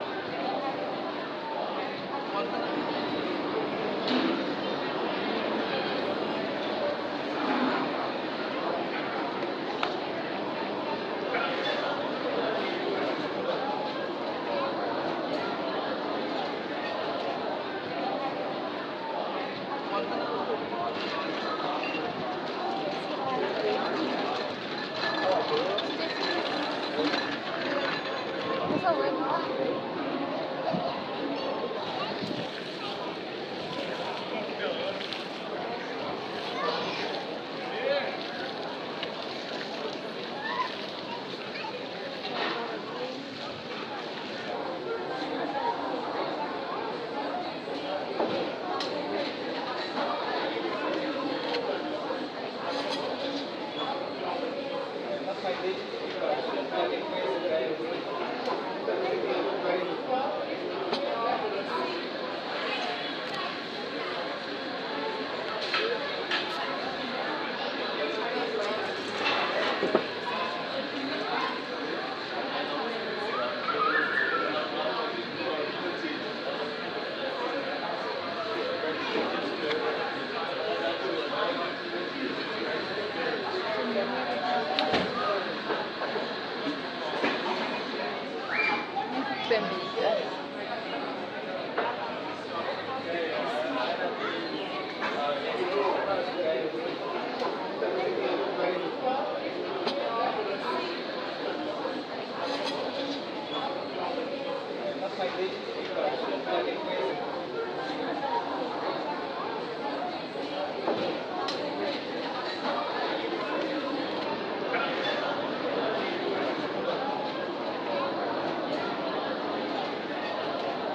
Restaurant ambience in an open air restaurant with four other restaurants next to it.